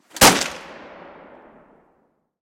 gun lee enfield 303 rifle fire shot loud badass